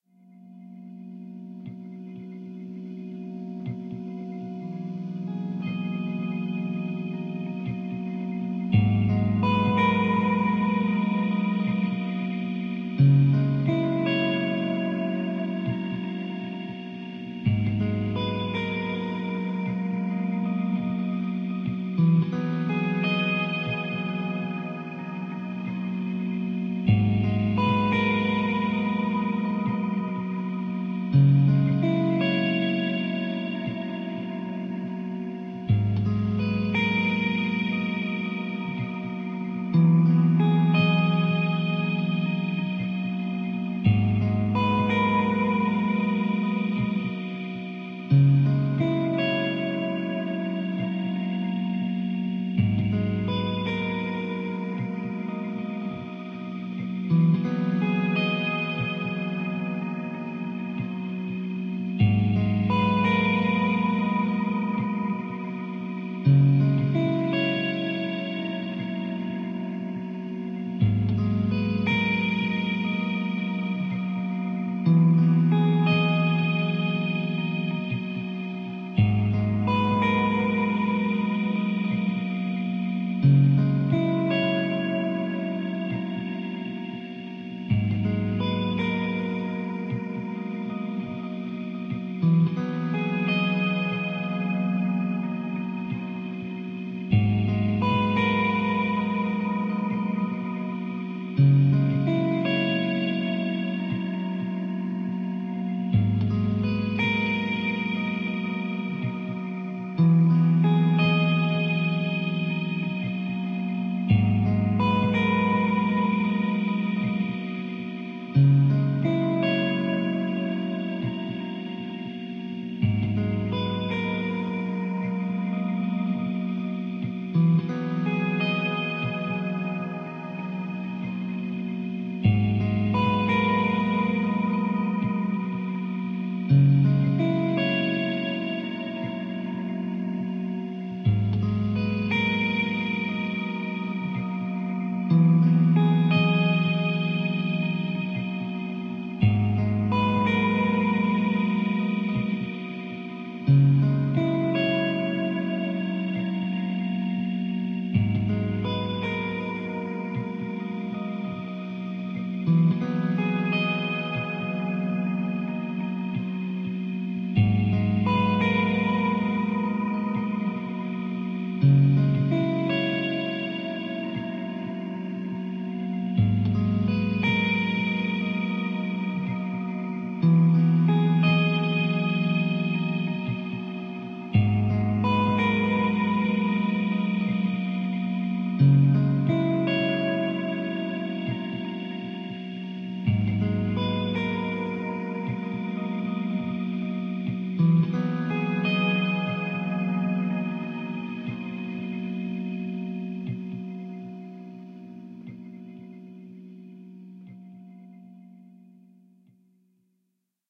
Soundscape - Dust - Ambient Guitar
Soundtrack, Synth, Background, Electric-Guitar, Acoustic, Calm, Moody, Music, Melancholic, Acoustic-Guitar, Synthesizer, Film, Atmosphere, Cinematic, Electric, Drone, Guitar, Minimal, Mood, Dramatic, Movie, Chill, Texture, Soundscape, Ambient, Pad, Instrumental, Melody, Slow, Loop